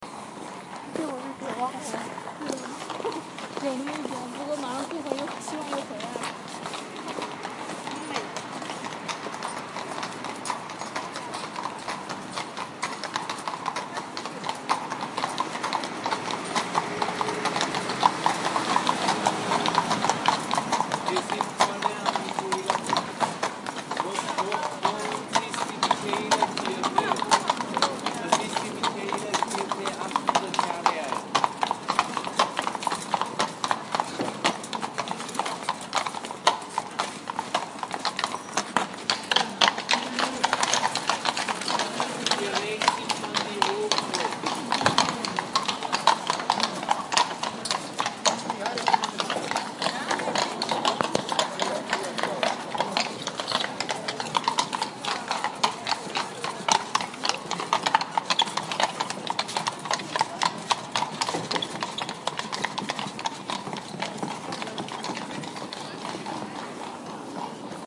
Horses Pavement Then Cobblestone
Horse and carriage over cobblestone with ambient street noise.
horses, street, clop, field-recording, asphalt, horse, road, hooves, cobblestone, carriage, clip